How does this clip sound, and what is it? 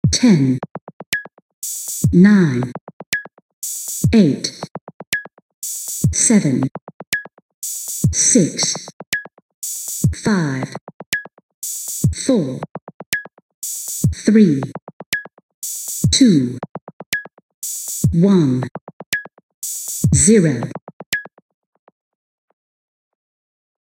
The counts are set in two-second intervals, voice quadrupled and spread in the panorama. Gaps filled with electronic percussion.
voice, processed, countdown